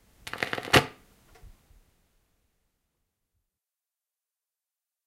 Vinyl end crackle 1
The sound the pickup needle makes when lifted up from a rotating vinyl record. This particular sound is rather abrupt.
Recorded in stereo on a Zoom H1 handheld recorder, originally for a short film I was making. The record player is a Dual 505-2 Belt Drive.